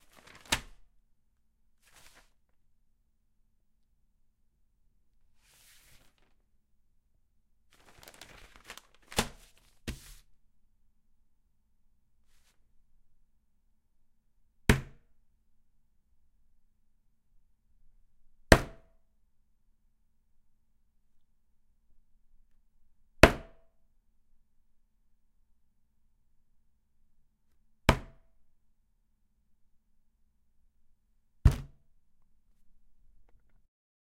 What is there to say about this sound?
Various paper and hand hitting wooden desk sounds.
desk, hand, hit, paper, slam, thud